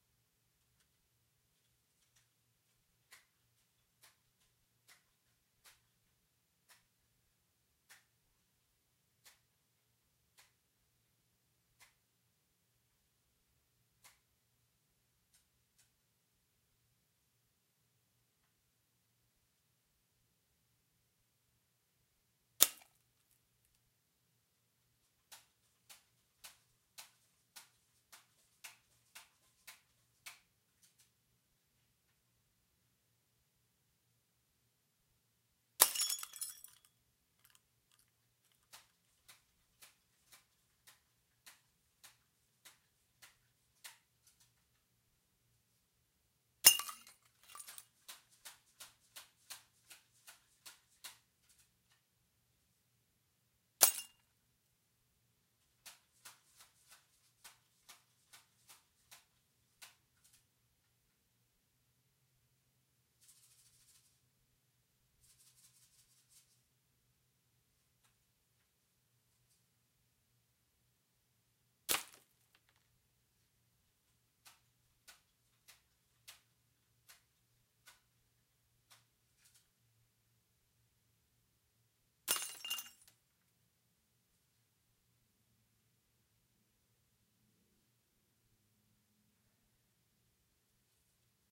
Several wine cooler bottles recorded with a Samson USB microphone direct to cool edit on the laptop as bb's from a 66 powermaster are fired from 30 feet away. First one hit labelled part, the rest hit higher towards the necks. for more splash.
air, bb, gun, plinking, rifle